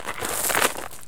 crunchy foot
Footstep in gravel. Recorded with a Tascam DR-44WL.
footstep, gravel, crunchy